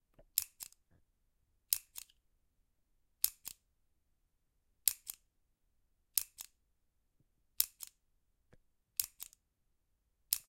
13FMokroluskyT stipani listku
chatter, ticket, boat